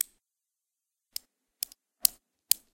essen mysounds denis
loadstones clicking to each other
object, mysound, germany, Essen